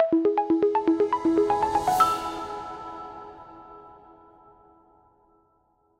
Corporate Rise-and-Hit 05
Corporate Rise-and-Hit logo sound.
corporate, logo, rise-and-hit